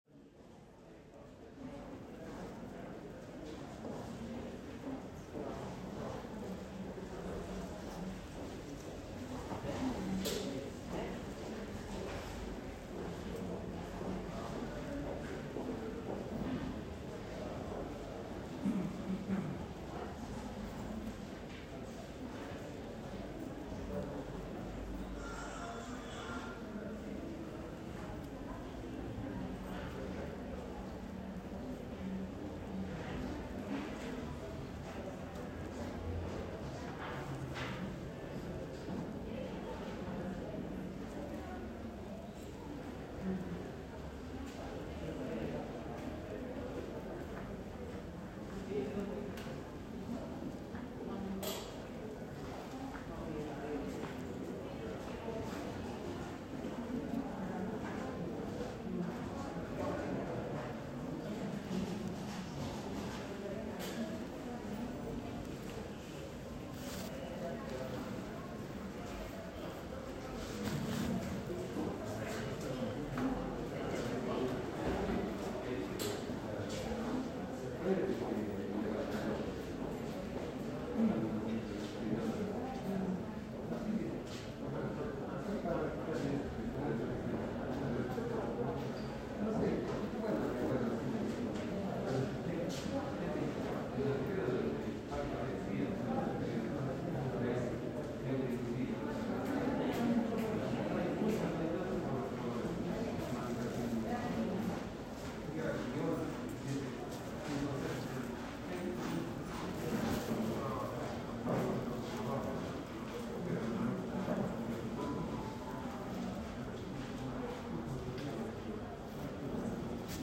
Office ambience
An open office building with sounds of people walking, talking and working with office materials.